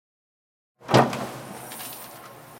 MITSUBISHI IMIEV electric car HATCHBACK OPEN
electric car HATCHBACK OPEN
car, electric, HATCHBACK, OPEN